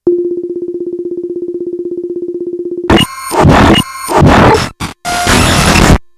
marimba trill grunt glitchese
Casio CA110 circuit bent and fed into mic input on Mac. Trimmed with Audacity. No effects.
Circuit, Casio, Table, Hooter, Bent